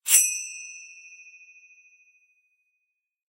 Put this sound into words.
Zyl Finger Cymbals Gliss
Finger cymbals ground together for a sliding glissando effect.
Cymbals, Chimes, Percussion, Hand, Finger, Zil, Zyl, Bell